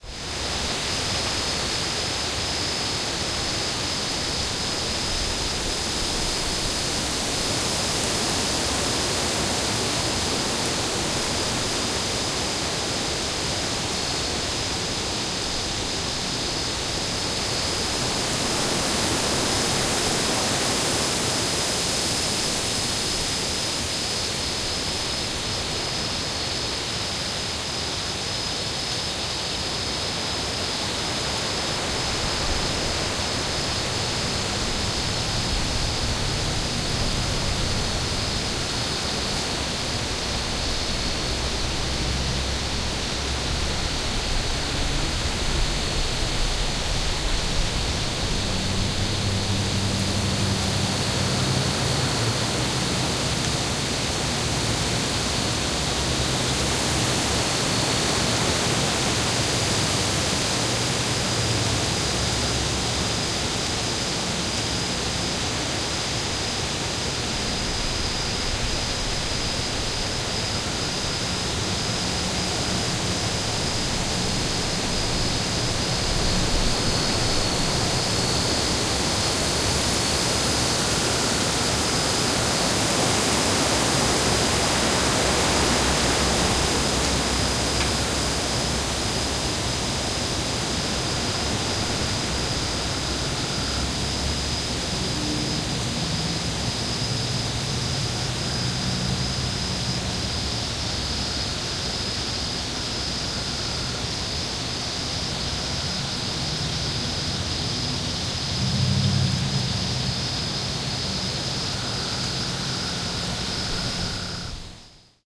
Wind in the Leaves
Wind in tree, insects and frogs in background, slight noise of single
auto in background. Recording of a Giant Pecan tree on a windy night in
north Texas. The wind currents this evening were such that the tree
80feet above the ground felt the breeze but the microphones did not!
Recorded with a pair of Rode Nt-1 Mics facing straight up into a tree. Spaced pair feeding a Sound Devices MixPre preamp. Recorded Straight into Cubase via echo indigo i/0 soundcard